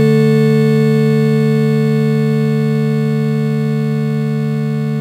Superness 9,0.5,0.5,2 n=3 imag
Imaginary axis from organ-like sound from Superness object with nine spikes, a = b = 0.5 with three overtones
additive, harmonics, synthesis